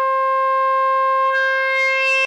C1 Root SAT
C1 recorded with a Korg Monotron for a unique synth sound.
Recorded through a Yamaha MG124cx to an Mbox.
Ableton Live
synth, sound, Monotron, Sample, sampler, sfx, korg